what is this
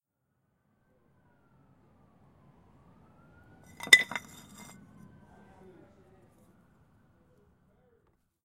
Pots a out sir
small pot shoved along fire escape. Foley use. Distant sirens in background.